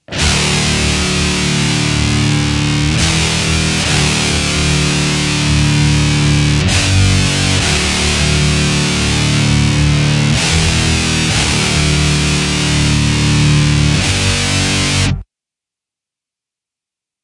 DIST GUIT FX 130BPM 1
Metal guitar loops none of them have been trimmed. that are all with an Octave FX they are all 440 A with the low E dropped to D all at 130BPM